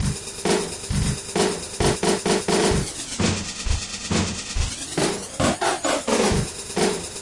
Rise and Fall 133
Catchy beat with an awesome end
133, bpm, drum, fall, loops, mix, music, rise